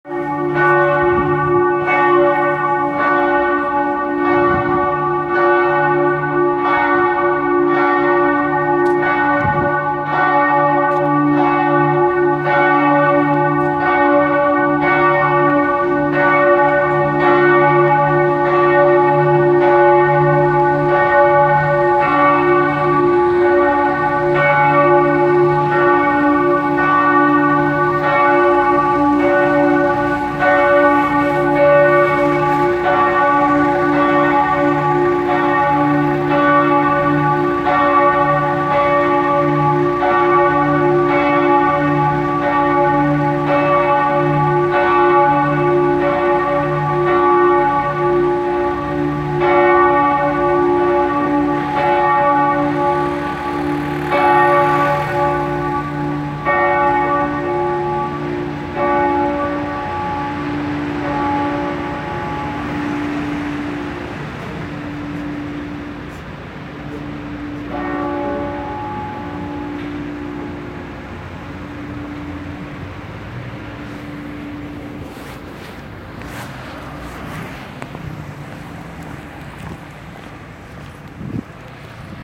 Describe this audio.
innstadt bells
passau innstadt church bells november 2016
2016, bells, cathedral, church, church-bells, innstadt, mass, november, passau